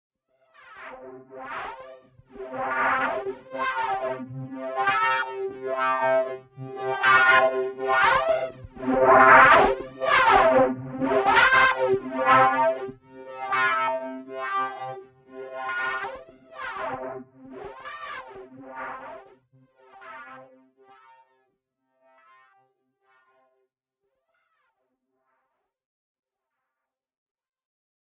Wobbling Computer Sounds
A wobbly sci-fi soundscape. I hope you like it!
If you want, you can always buy me a coffee. Thanks!
ambiance, atmosphere, noise, soundscape